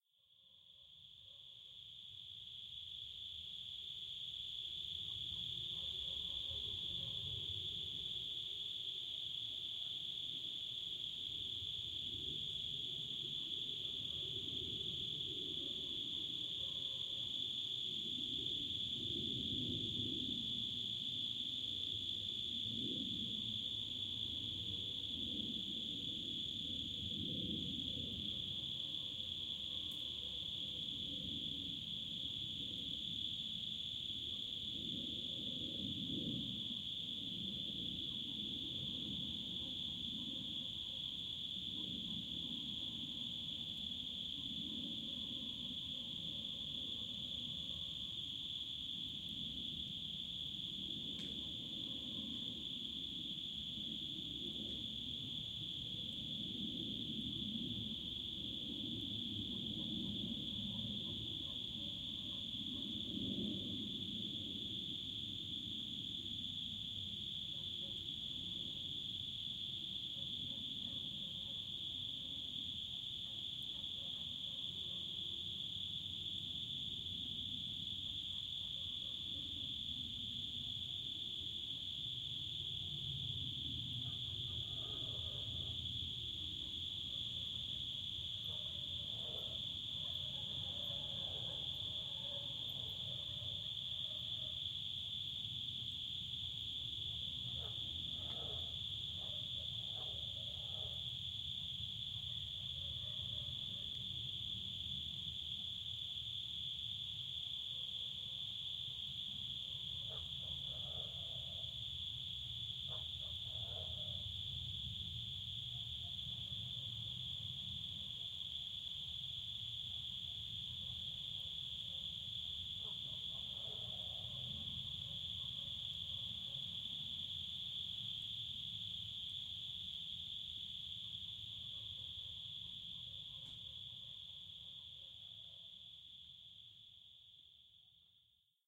Early spring night ambiance, with crickets singing, an overheading airplane and distant dog barkings every now and then. Recorded at Bernabe country house (Cordoba, S Spain) using Audiotechnica BP4025 inside blimp, Shure FP24 preamp, PCM-M10 recorder.